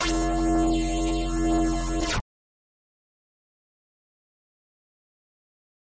Sween Unwrap 3 (Long)
A very long intro - more of a keening, really - followed by a sound that rather resembles a dj's scratching of vinyl.